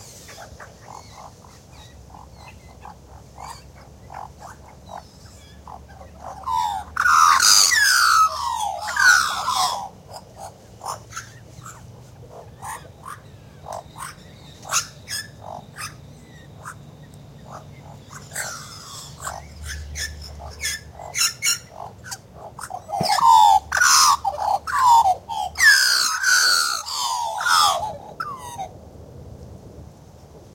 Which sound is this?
Australian Magpie (Western) 'Gymnorhina tibicen dorsalis', family group carolling warbling in Kings Park Perth Western Australia. Magpies call in family groups to locate each other, acert dominance and too defend their territory. (recorded August 2016, trimmed to 30s).
Magpie-carolling-warbling, Nature, Magpie, Australian-magpie, Australia, Morning, Field-recording, Peaceful, Urban, Park